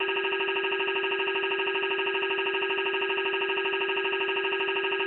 Text Scroll G4 180 OpenMPT (Agogo)
A sound made in OpenMPT using the "Agogo" sound sample that could be used during scrolling text.
dialog, dialogue, metallic, robot, scroll, speak, speaking, text, voice